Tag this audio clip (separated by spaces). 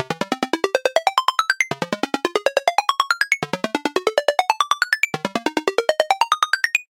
ring winner jordan 3 mono ring-tone alert mojo-mills phone mojomills 01 cell-phone tone cell ring-alert free mills